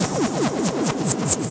Made with a synth and effects.